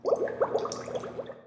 Bubbling water with reverb to simulate being underwater
underwater, bubbles, home-recording, water, bubble, liquid